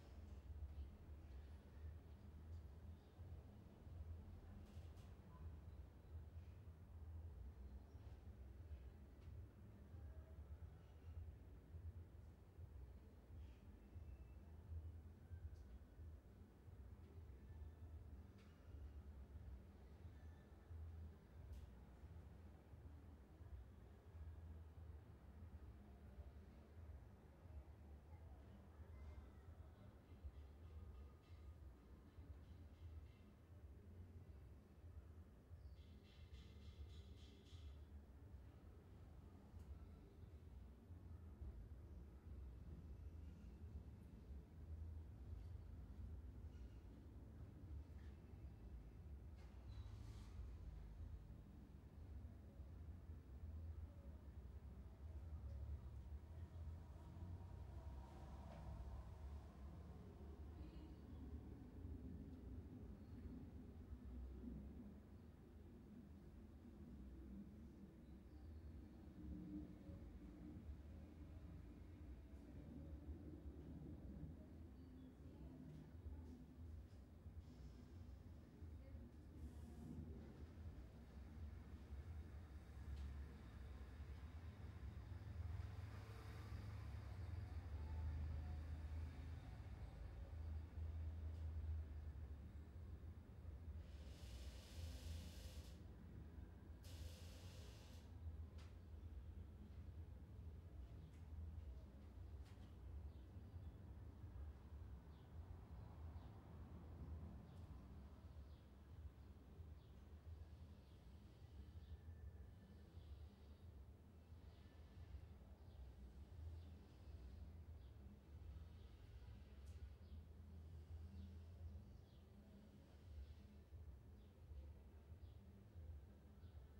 Roomtone recorded at a house in a ghetto with a few cars passing by, isolated voices in spanish and faraway music (indistinguishable, just the bass). Recorded at location in a Monterrey neighborhood with a Sanken CS3-E microphone and Zaxcom Fusion II recorder.